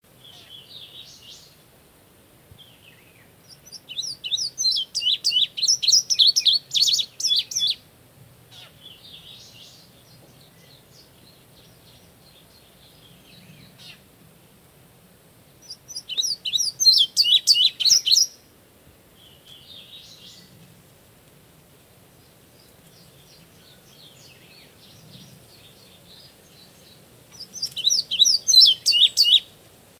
An indigo bunting vocalizing on the mountain
avian, bird, birding, birds, bunting, call, calling, chirp, field-Recording, indigo, Indigo-bunting, nature, tweet, vocalizing